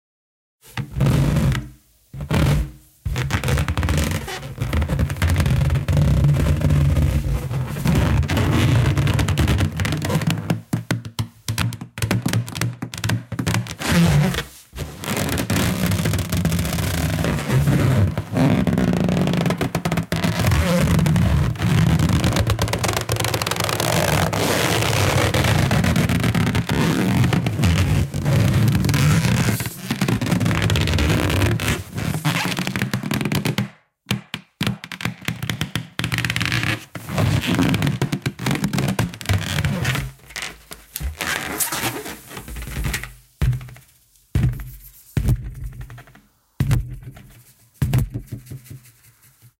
Baloon Randomness 2...
Zoom, DYI, Drum, Baloon, h5